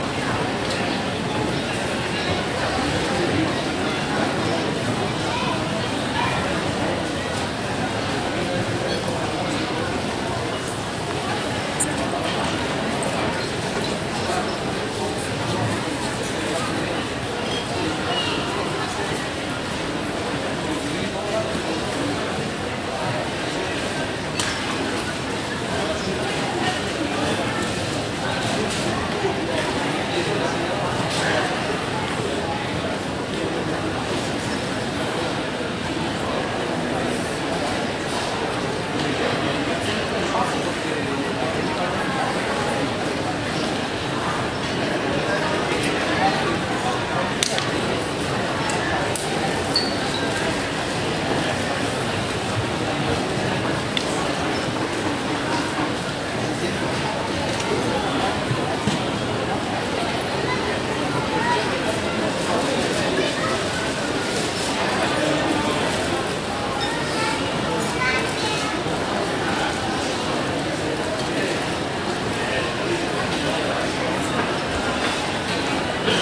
Recorded in Heathrow Airport terminal 3 departure lounge with a Canon D550.